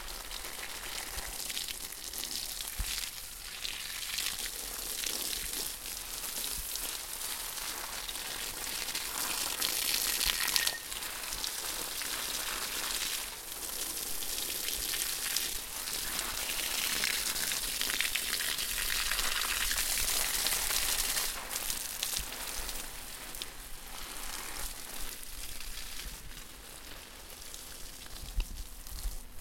Watering the garden
Someone watering flowers and plants in the garden. Recorded with Zoom H1.
ambient, hose, watering, ambience, splash, field-recording, water